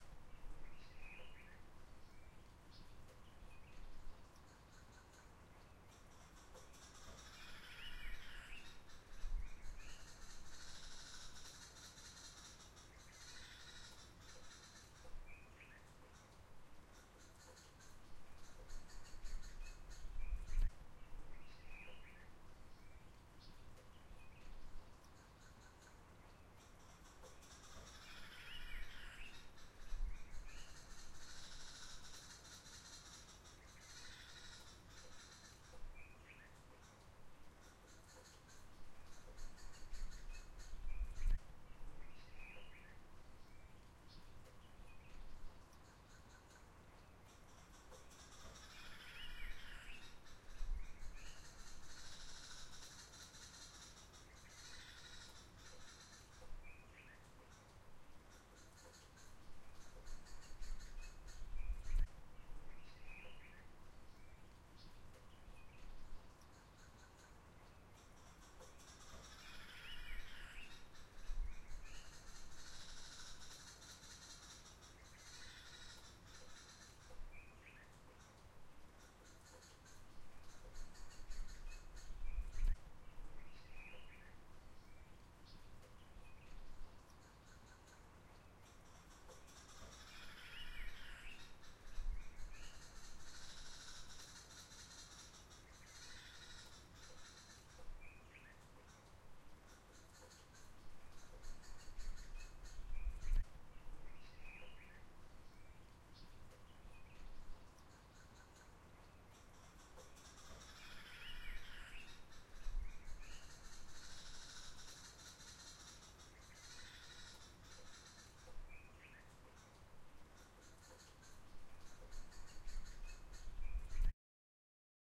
Ambience of outdoors at night